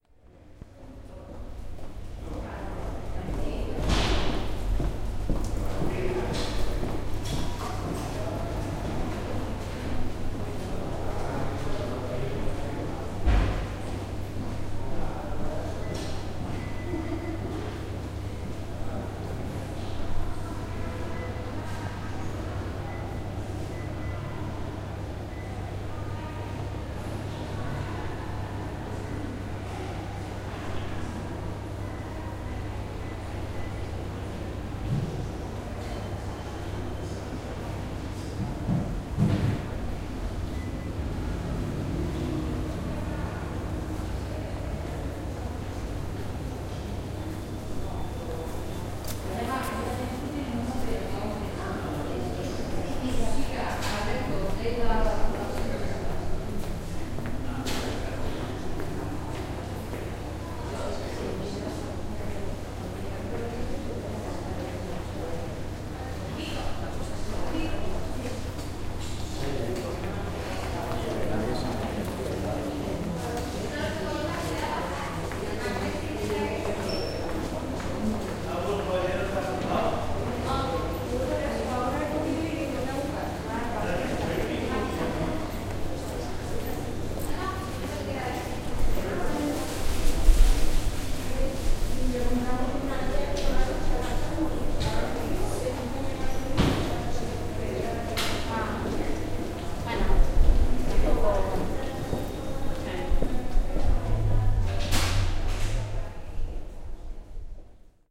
enviroment hospital
You can hear the environment of a Clínic Hospital corridor from Barcelona.